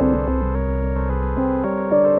some loop with a vintage synth